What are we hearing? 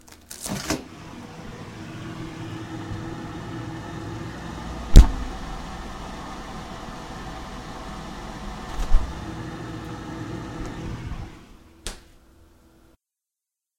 recording of putting a mic in the freezer for few seconds and taking it out